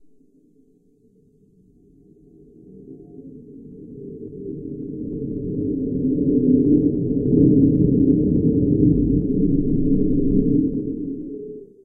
weird ambient
Another version of my starship sound. Sounds like something you could hear on another planet.
wind, sci-fi, weird, ambient, alien